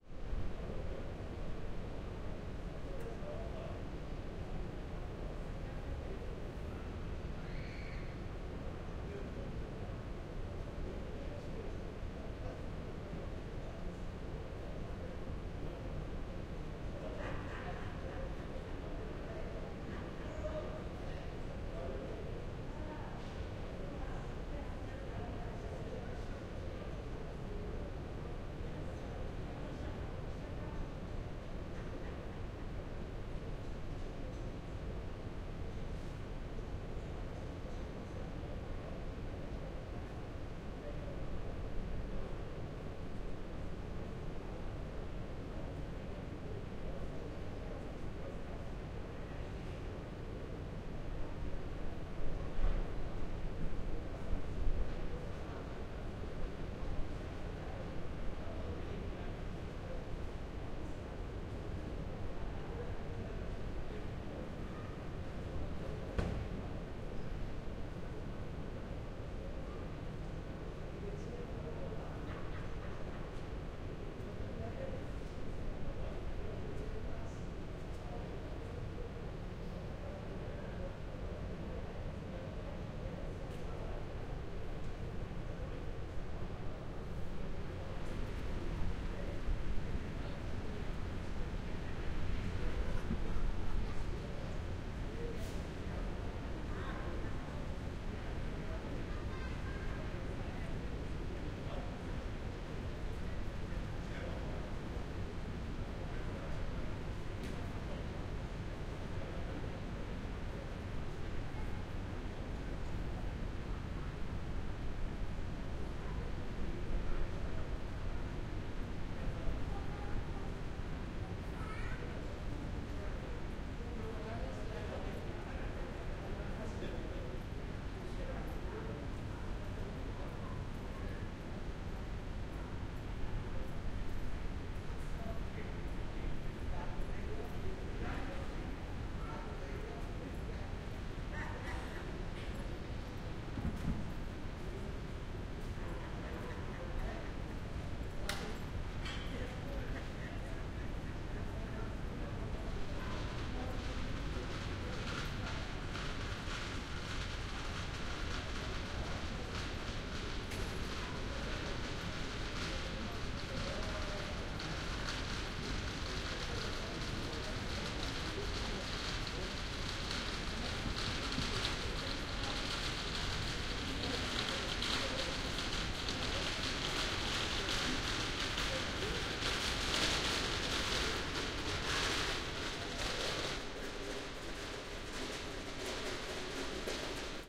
Marseille Street
City, Marseille, Street